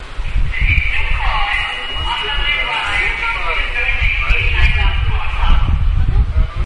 subway announcement unintelligible metrocard beep wind